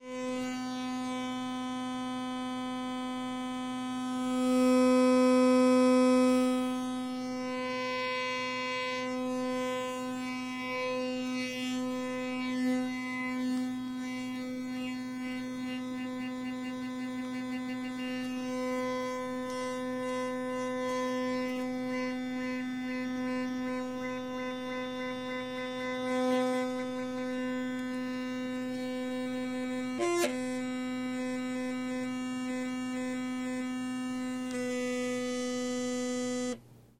electric toothbrush2
An extended recording of different sounds made using an electric toothbrush.
electric, toothbrush